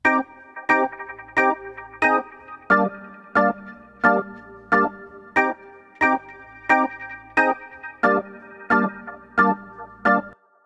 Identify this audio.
zulu 90 CG key chop
Reggae rasta Roots
rasta, Roots, Reggae